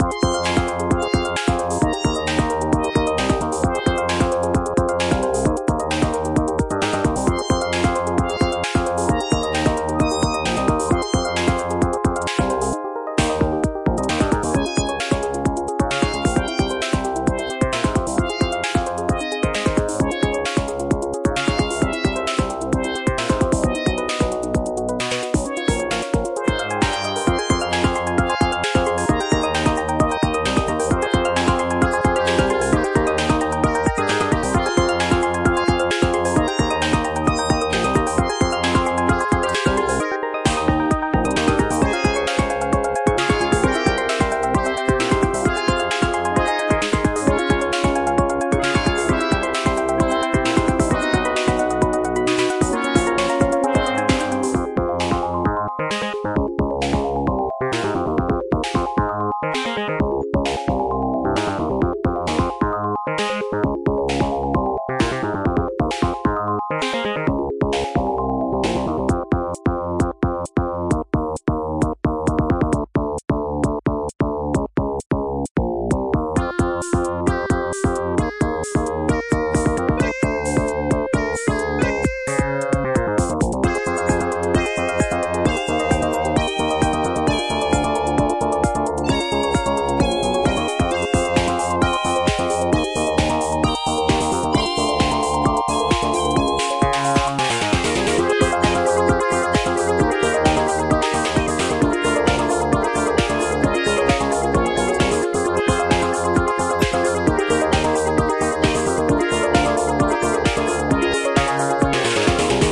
Spacey VG Music Loop
VG music loop made for a jam that never came together in the end.
chiptune, 8-bit, arcade, vgm, game, chip, video-game